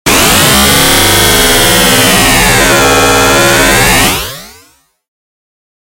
ΑΤΤΕΝΤΙΟΝ: really harsh noises! Lower your volume!
Harsh, metallic, industrial sample, 2 bars long at 120 bpm with a little release, dry. Created with a Yamaha DX-100